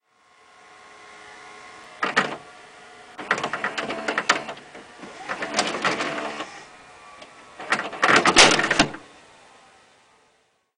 Sony VCR ejecting. Recorded with CyberShot DSC-h10 and edited in Audacity.
mechanical, whir, vcr, servo, electric, machine